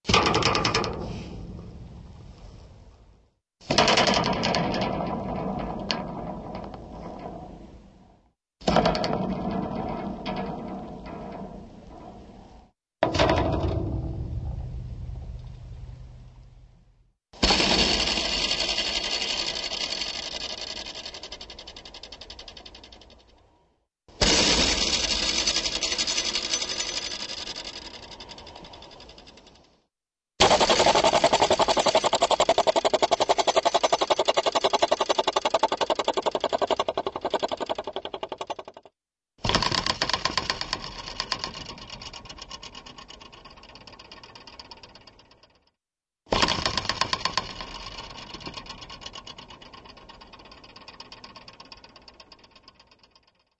Wire explosions / vibrations (slow)
Metal wire on old country fence twanged to produce these sounds, but some evidence of rural environment in b/ground.
As my other Wire explosions file but half speed.
wire, boing, metal, bang, explosion, industrial, vibration, twang, sci-fi